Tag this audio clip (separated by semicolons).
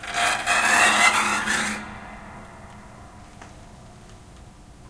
fx; sound-effect; industrial